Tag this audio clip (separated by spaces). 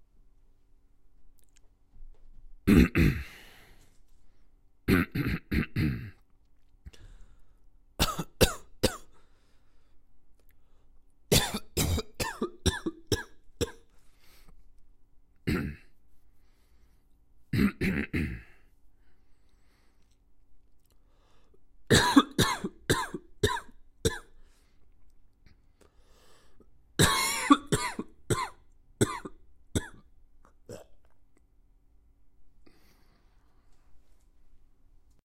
cough hack coughing